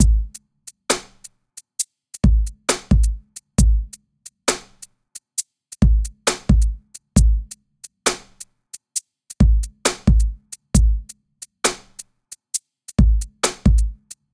postdubstep-loop1
This is a Post-Dubstep loop I put together in Renoise at 134.
garage, loops, 909, tracker, future, renoise, future-garage, 808, post-dubstep, dubstep